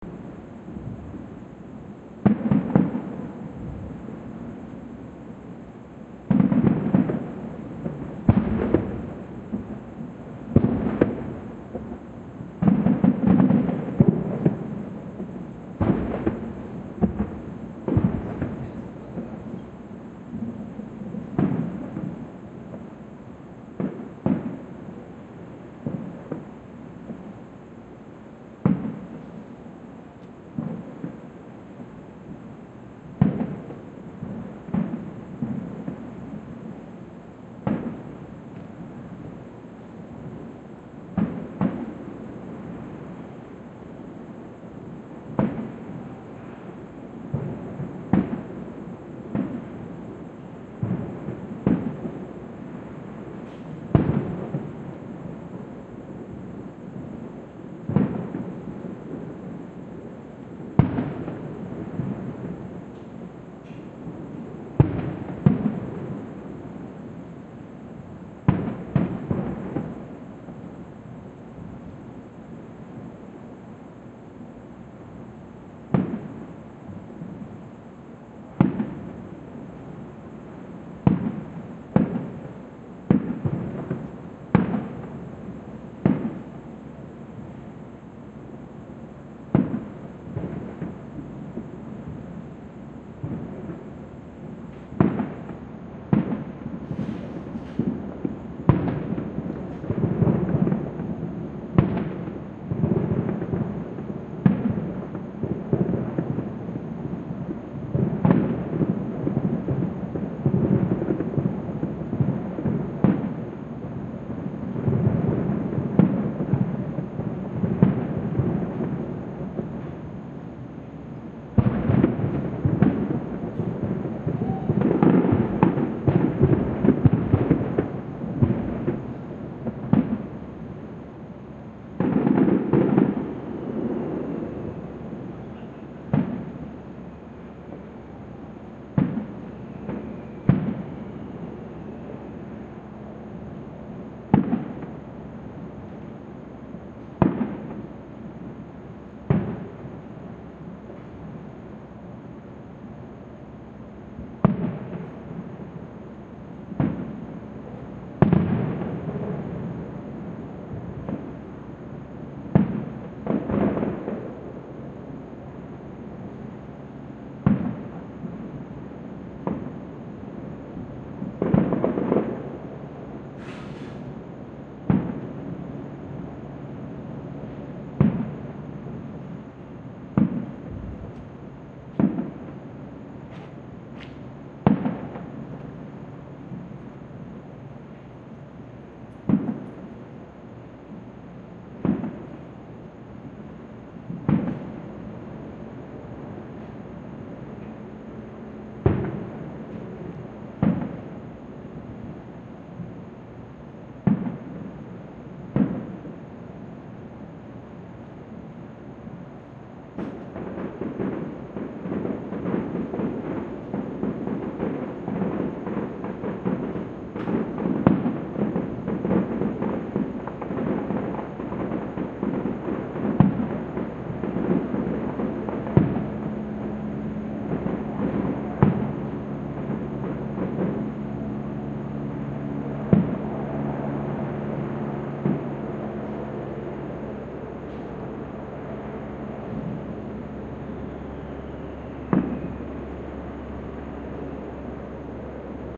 This is a stereo recording of distant fireworks on the 4th of July in Los Angeles. Some partiers' voices, and the occasional helicopter mar the otherwise nice recording.
Recorded with: Audio Technica BP 4025, Sound Devices 702t